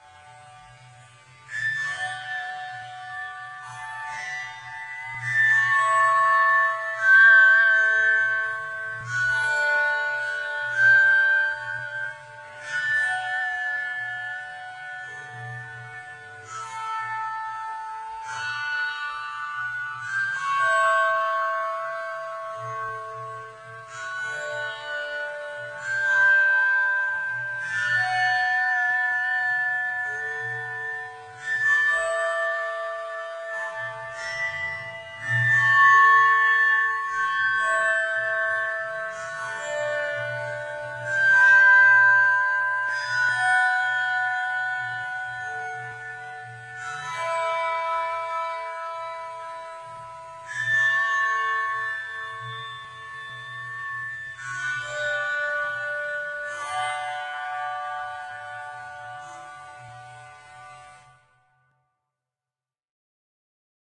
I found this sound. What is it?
Music Box3
A collection of creepy music box clips I created, using an old Fisher Price Record Player Music Box, an old smartphone, Windows Movie Maker and Mixcraft 5.
Antique, Chimes, metallic, Music-Box